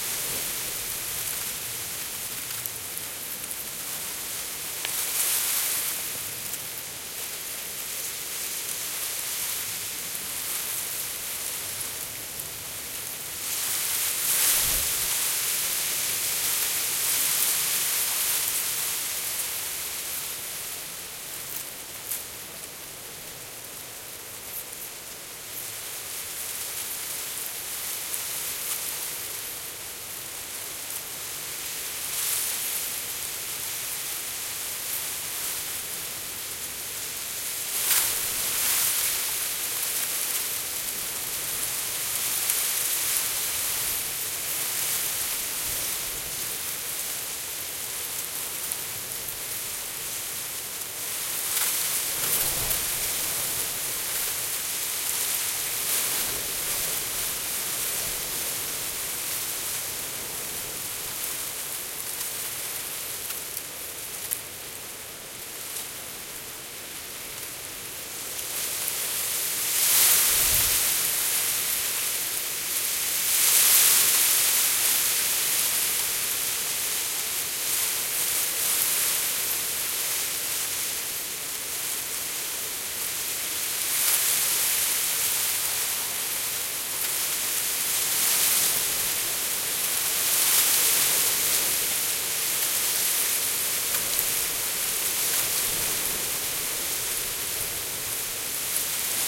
Grass Blowing in Wind
Grass blowing in the wind with a high-pass filter to get rid of some low wind rumble recorded with M/S mics on a Zoom H2N with a Rode windscreen.
windy, nature